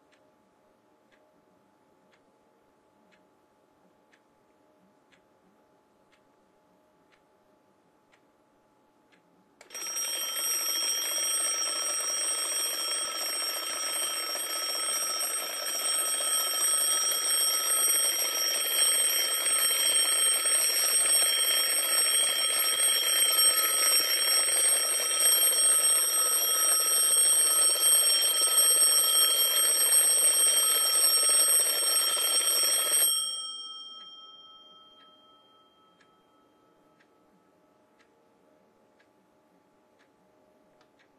Twin Bell Alarm Clock
A great recording of a rather old twin-bell alarm clock. No electronic ringers here, just the real thing. The classic alarm clock sound we all remember!
alarm, bell, ringer, vintage, twin, mechanical, clock, antique, effect, sound